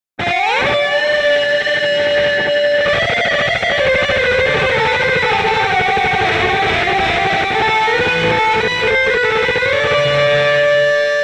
Chopped up pieces of a guitar solo stripped from a multritrack recording of one of my songs. Rogue electric strat clone through Zoom guitar effects.
environmental-sounds-research, solo, electric, guitar